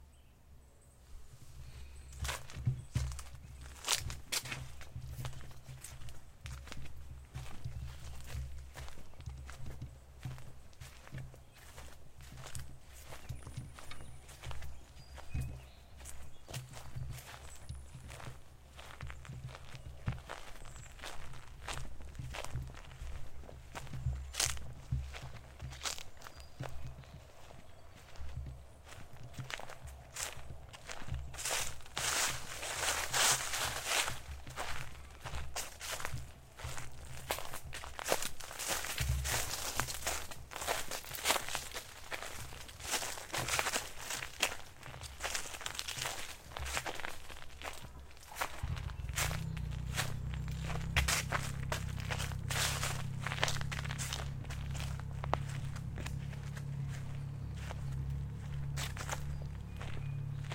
footsteps on fall leaves and boat motor in distance -- 1min
outdoors, field-recording